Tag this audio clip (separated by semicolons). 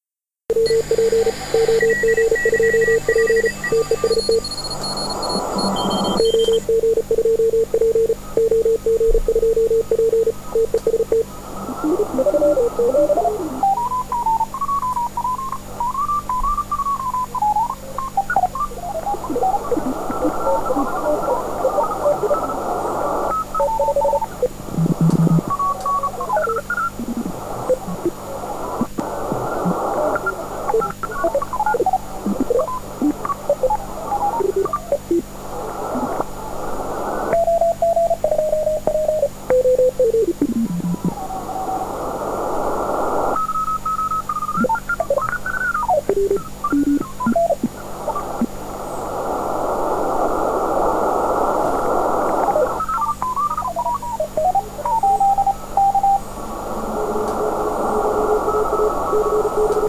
morse; Twente; tunning; radio; dare-28